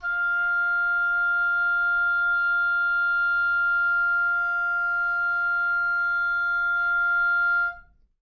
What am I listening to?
One-shot from Versilian Studios Chamber Orchestra 2: Community Edition sampling project.
Instrument family: Woodwinds
Instrument: Oboe
Articulation: sustain
Note: E#5
Midi note: 77
Midi velocity (center): 95
Microphone: 2x Rode NT1-A spaced pair
Performer: Sam Burke
esharp5, midi-note-77, midi-velocity-95, oboe, sustain, woodwinds